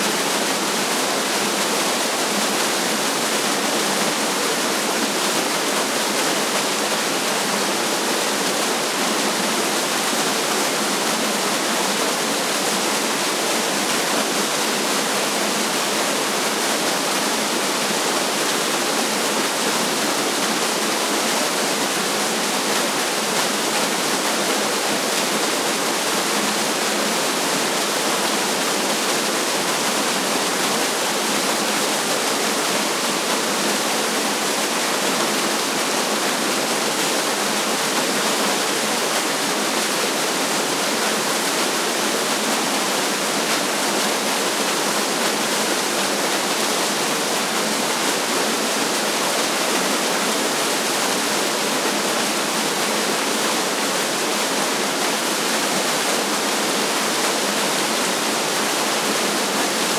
Waterfall in Glacier Park, Montana, USA